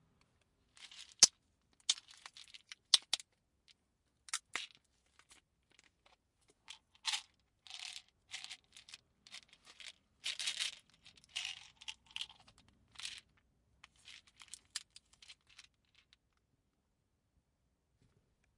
Removing pills from container 01
Concerta child lock twist open lid, pills, full container
twist-open, medicine, bottle, drugs, child-lock, pills, OWI, container